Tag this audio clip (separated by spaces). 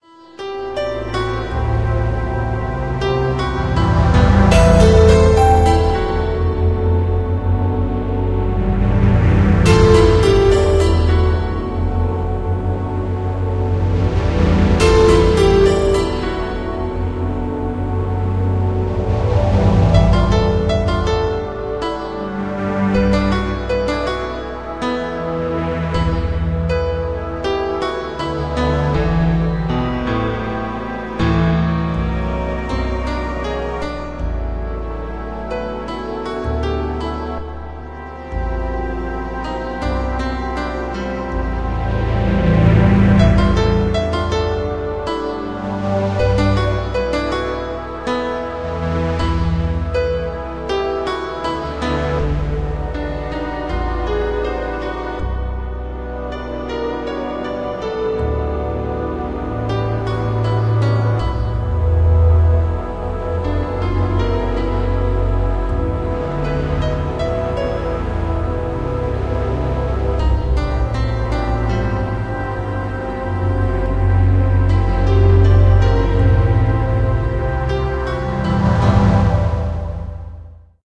ambiance scary synth background piano game song retro ethereal 16bit music airy